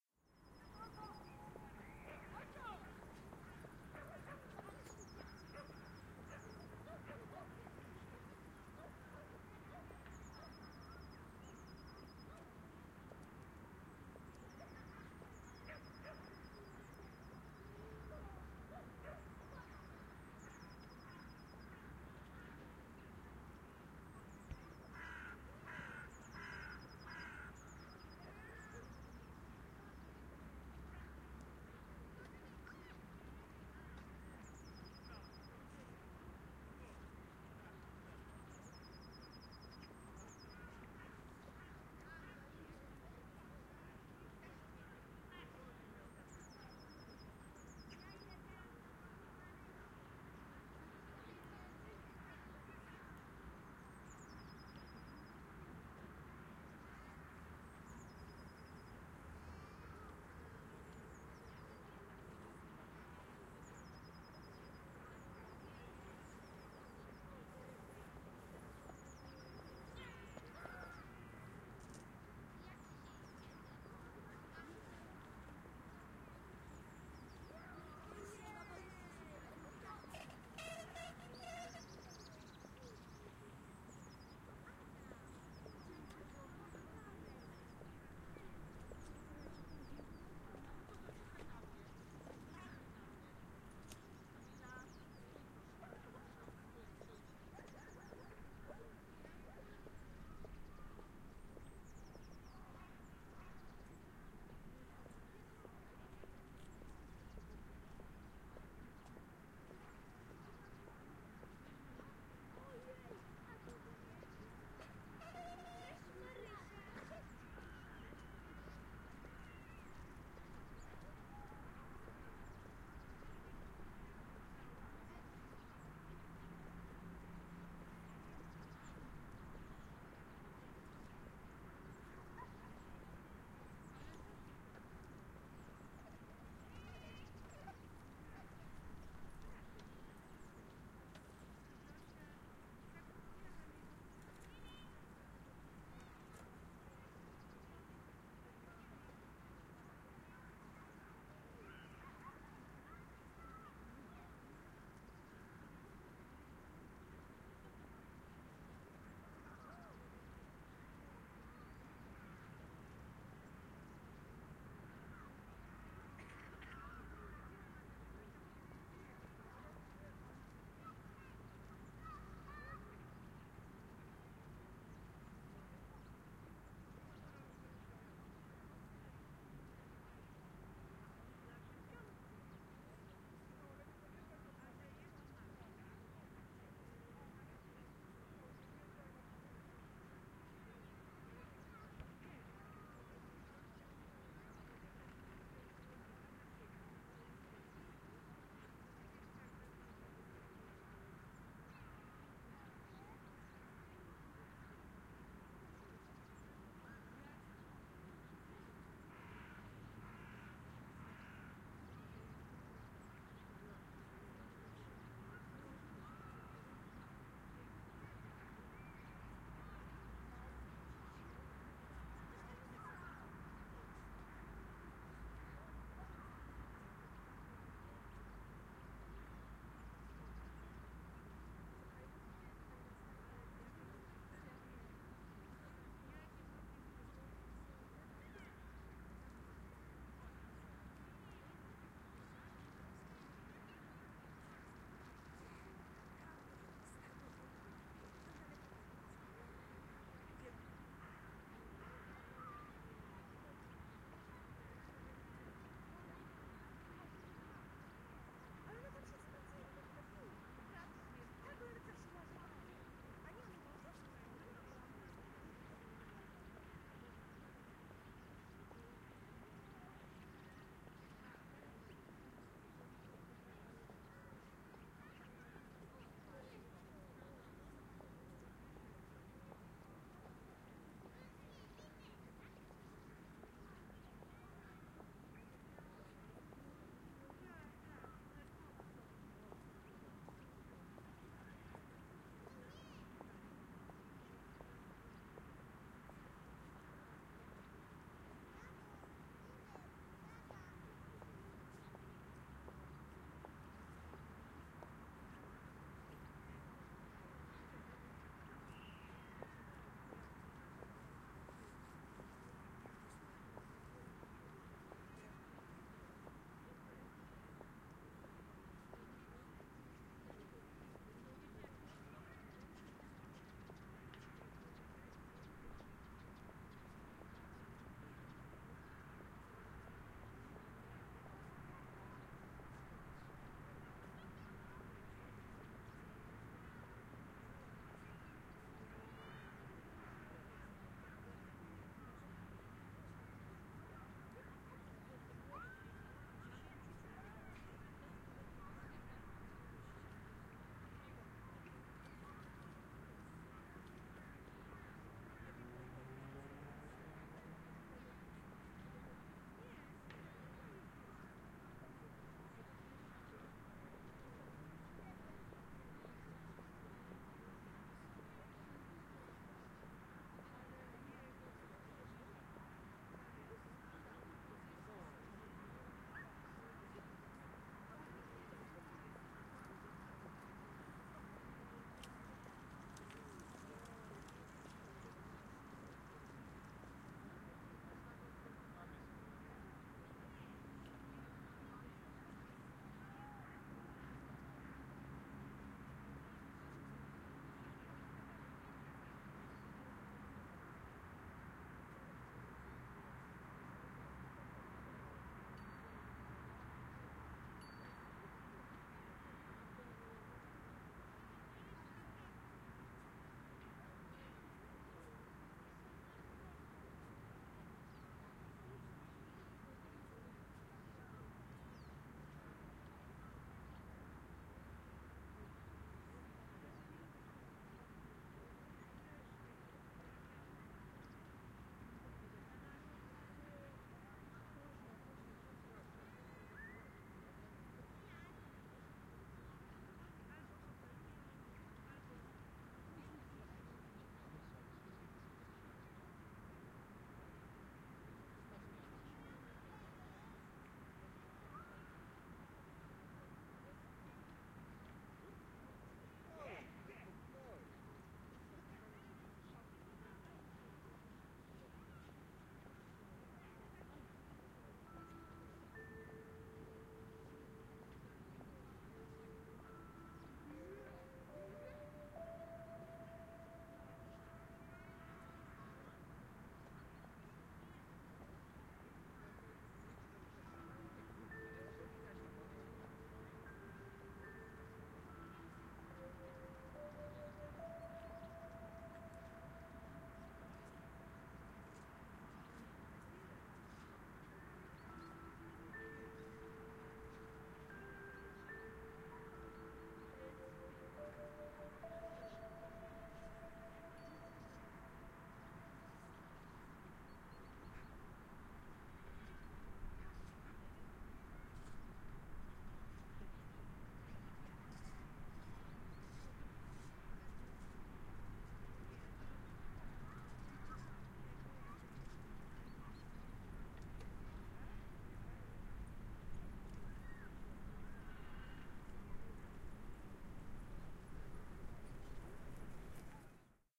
Targowek-Park-Brodnowski-godzina-15
PL: Nagranie zegara z Parku Bródnowskiego na Targówku w Warszawie.
ENG: Recording clock Bródno park Targowek in Warsaw.
bell, bells, clock, ring, Targ, wek, zegar